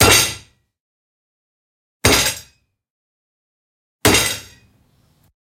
Hit on table
3 hits over a wooden kitchen table with dishes, glasses and cutlery on it. I forgot to properly clean and fade some undesirable background noise in the last one, sorry.
Gear: Zoom H6 with XY capsule
Thanks!
angry, dishes, glasses, hit, hit-on-table-with-dishes, kitchen, table-with-dishes